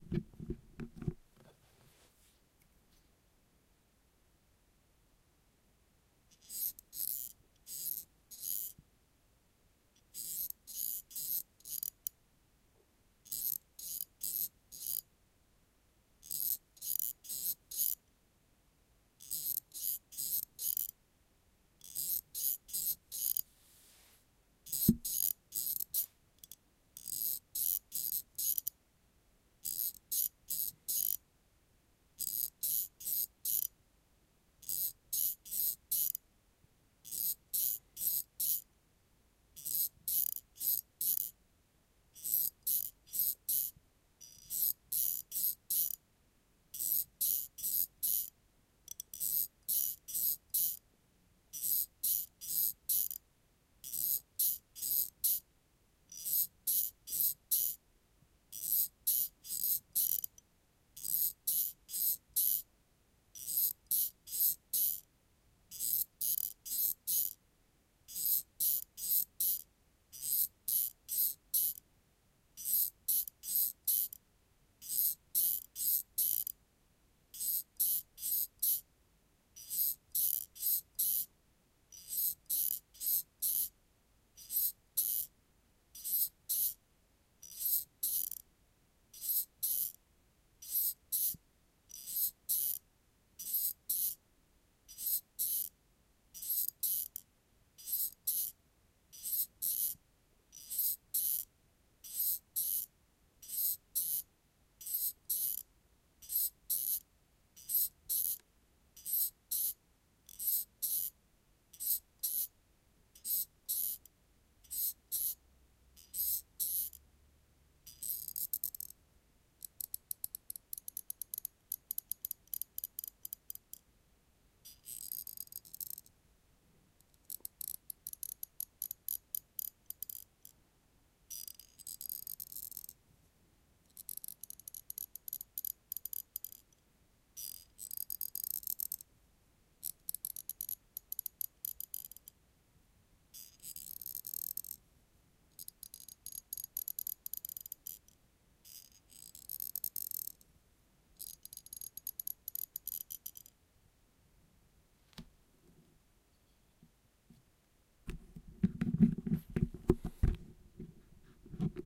Office staple remover raw sample (zoom h2n)
Sound produced by common office staple remover captured raw with Zoom H2n.
mechanical, pivot, spring, staple, staple-remover